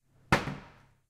Sound of a locker door closing.
UPF-CS12, campus-upf, closing, door, locker